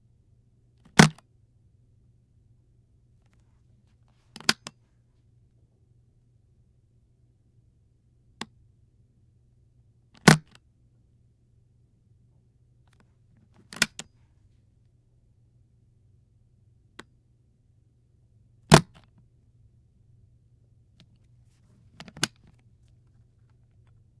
briefcase locks
business briefcase latch opened and closed a few times.
Sennheiser 416T -> Sound Devices MixPre -> Zoom H4N.
click, foley, lock, briefcase, latch